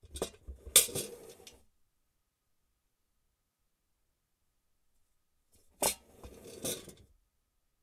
Small metal bucket being pushed
Pushing a small metal bucket across a surface.
bucket, drag, handle, hinge, hinges, metal, metallic, movement, pail, push, scrape, swing, swinging